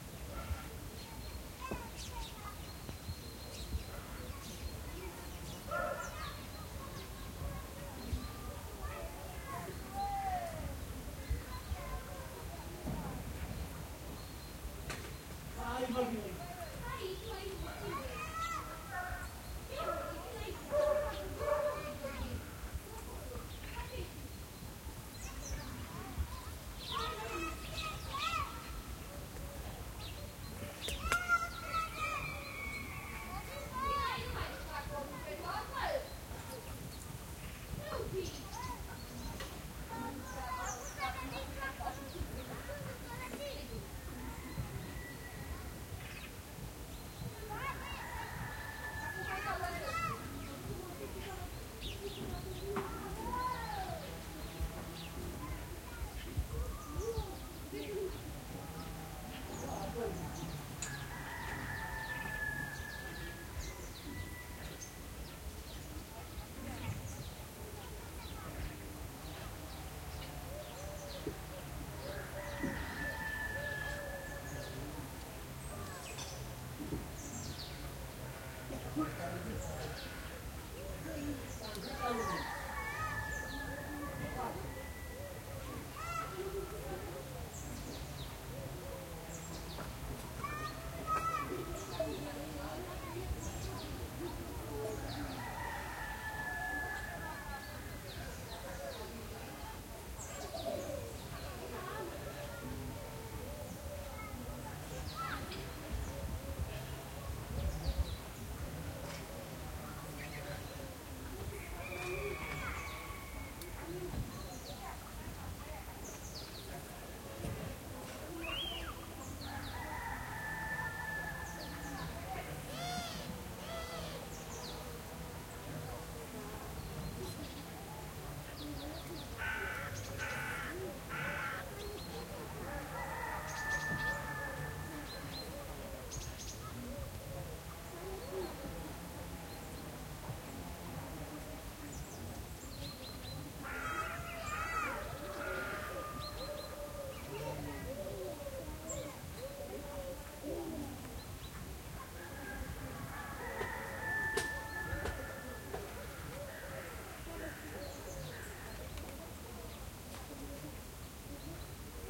201006 Tichindeal GypStl Well Evening 2 st
An early autumn evening in a settlement of Roma gypsies the Transsylvanian village of Țichindeal/Romania, basically just two mud roads with about 50 or so hovels in various states of disrepair.
The recorder is standing at the entrance to the settlement, children are shouting to each other, grown ups are going about their business, someone is using a chainsaw in the far background, crows, roosters, dogs and pigeons can be heard in the background.
Recorded with a Rode NT-SF1 and matrixed to stereo.
ambience, birds, chainsaw, countryside, crow, dogs, field-recording, gypsy, people, pigeon, Roma, Romania, rural, talking, Transsylvania, village, work